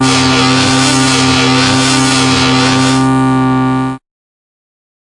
Alien Alarm: 110 BPM C2 note, strange sounding alarm. Absynth 5 sampled into Ableton, compression using PSP Compressor2 and PSP Warmer. Random presets, and very little other effects used, mostly so this sample can be re-sampled. Crazy sounds.
glitch, synth, club, pad, electronic, sci-fi, atmospheric, bounce, dance, electro, sound, hardcore, glitch-hop, techno, acid, 110, trance, dark, synthesizer, noise, bpm, resonance, processed, effect, rave, house, porn-core